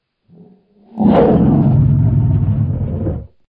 A cool roar I created with a leopard roar and a human sneeze.
dinosaur, dino, roar, creature, monster